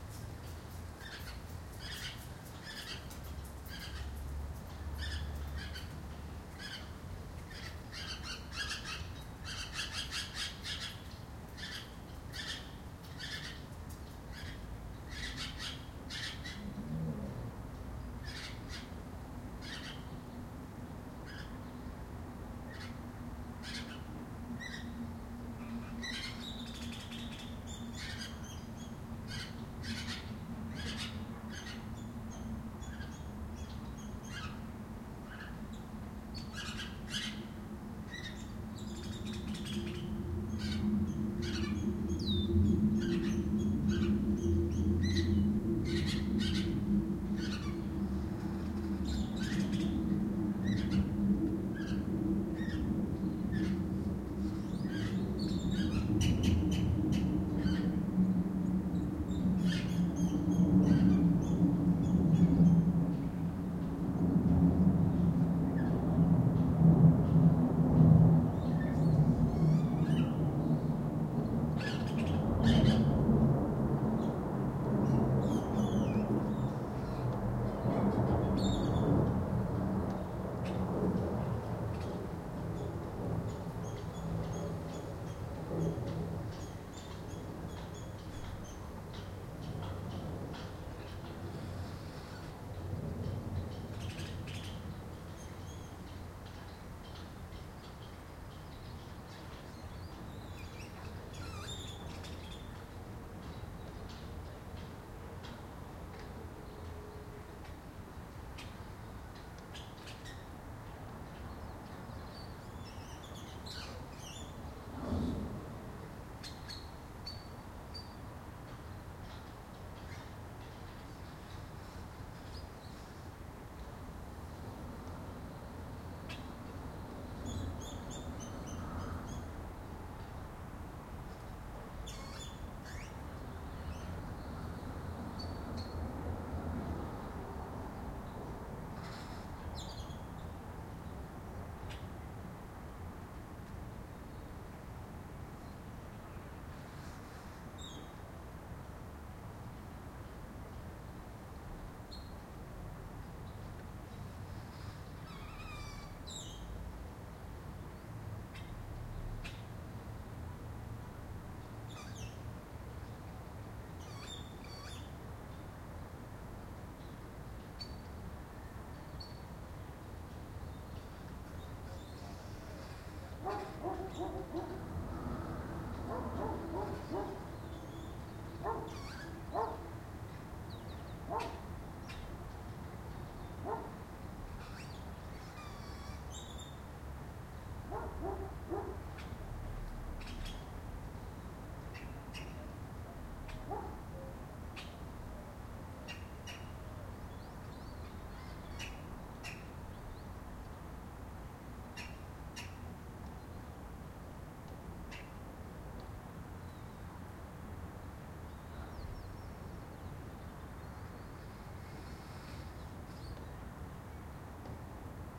Suburbs captured with Zoom h1. You can hear some birds all the way. Theres a dog in one part and theres a plane but you can cut it with a high pass filter.